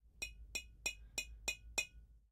glass jar tap fingernail
a large glass jar being tapped by a fingernail